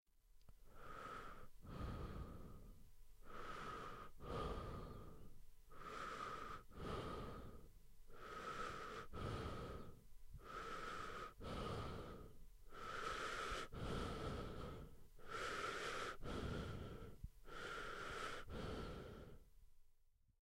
breath in and out
male breaths in and out
breath-in, breath-out, male